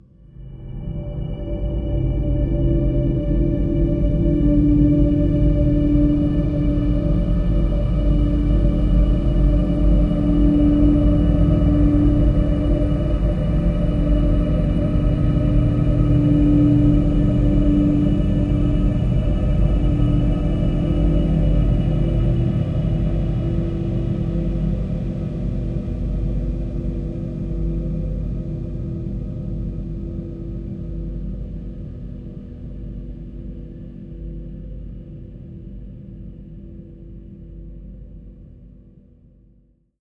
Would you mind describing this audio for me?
LAYERS 023 - Thin Cloud-86
LAYERS 023 - Thin Cloud is an extensive multisample packages where all the keys of the keyboard were sampled totalling 128 samples. Also normalisation was applied to each sample. I layered the following: a thin created with NI Absynth 5, a high frequency resonance from NI FM8, another self recorded soundscape edited within NI Kontakt and a synth sound from Camel Alchemy. All sounds were self created and convoluted in several ways (separately and mixed down). The result is a cloudy cinematic soundscape from outer space. Very suitable for soundtracks or installations.
cinimatic, cloudy, multisample, pad, soundscape, space